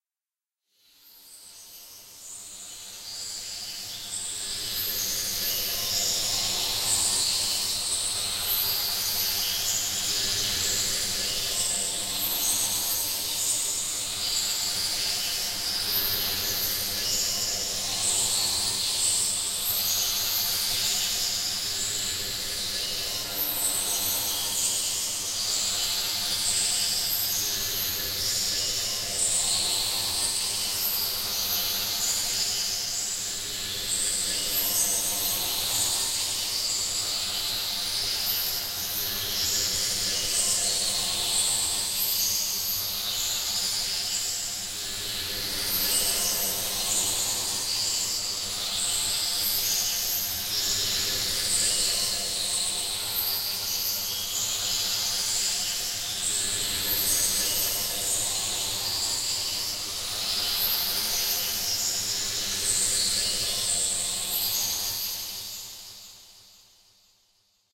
cine background3
made with vst instruments
mood, music, trailer, scary, movie, drone, suspense, film, space, thrill, atmosphere, pad, hollywood, horror, ambient, background-sound, drama, background, cinematic, dramatic, dark, thiller, sci-fi, ambience, deep, soundscape, spooky